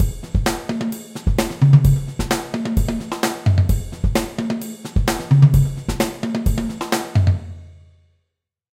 Made in FL 10 using FPC kit